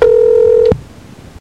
Outgoing ring snippet from prank call tape from the early 90's. Sound of the phone ringing from the callers perspective....
noisy, phone, ring